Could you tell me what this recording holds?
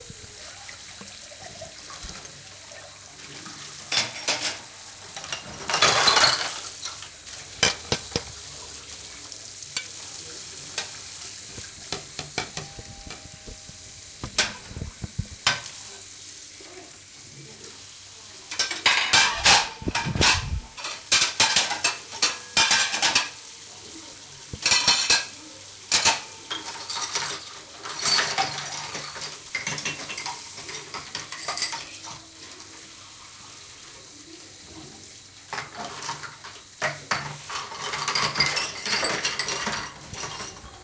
We will use this sounds to create a sound postcard.
barcelona, sonicsnaps, spain, doctor-puigvert, sonsdebarcelona